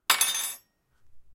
A metal spoon being dropped onto my desk. Recorded from about 30cm away. It clatters and vibrates for a bit.